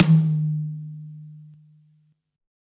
High Tom Of God Wet 011
drum, drumset, high, kit, pack, realistic, set, tom